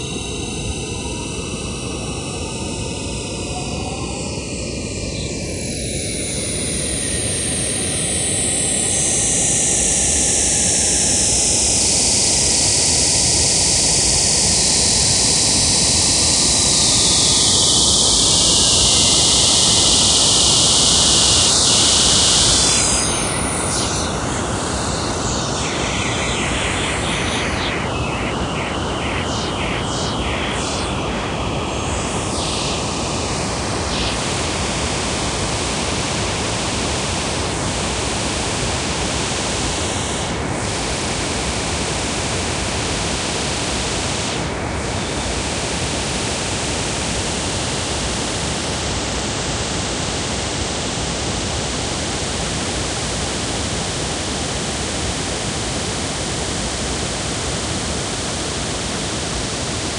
the sample is created out of an image from a place in vienna
image, Thalamus-Lab, synthesized, processed